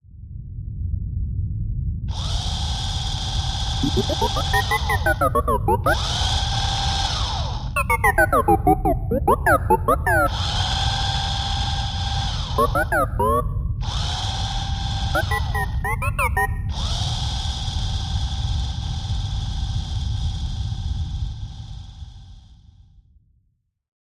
The spaceship's assistant robot rolls through the halls, chattering all the while.